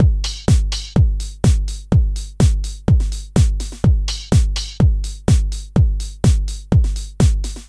Sicily House alt Intro
Sicily House Beats is my new loop pack Featuring House-Like beats and bass. A nice Four on the Floor dance party style. Thanks! ENJOY!
bassy, dance, four-on-the-floor, italy, beat, chilled-house, house, 125bpm